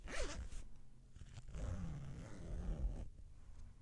Opening and closing a zipper in different ways.
Recorded with an AKG C414 condenser microphone.